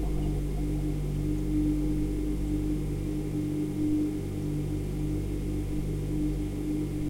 Refrigerator Fridge
I put my microphone into my Fridge.
appliance, cold, freezer, fridge, refrigerator